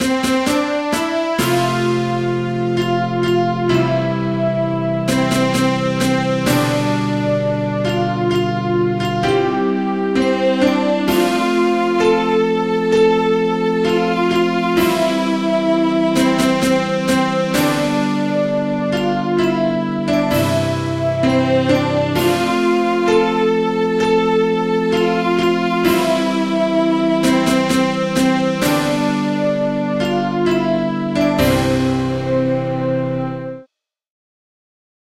The Last Victory
A short, melancholic fanfare.
ceremonial, fanfare, jingle, melancholic, music, victory